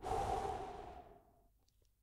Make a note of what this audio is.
Mouth Blowing
Recording of human male blowing into microphone. Recorded using a Sennheiser 416 and Sound Devices 552.
mouth, unprocessed, human, male, raw, man, blowing